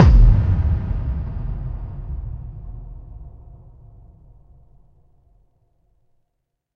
SS BOOM 01
Low frequency 'boom' sound of the type used in dance records.
disco, dance, boom, vlf, drum, sub